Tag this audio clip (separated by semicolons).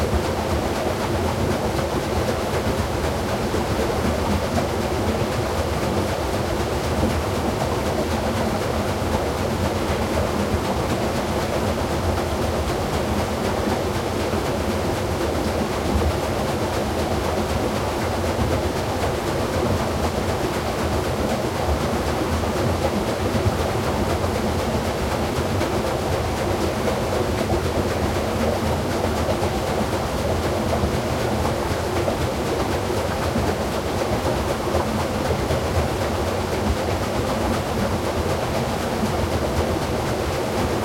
atmo; Brandenburg; close-range; field-recording; front; Germany; Gollmitz; H2; mechanic; mill; nature; old; rushing; sluicing; surround; water; watermill; zoom